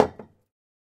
Put Down Glass Object
Putting down an empty glass on a work surface. Recorded with a Zoom H2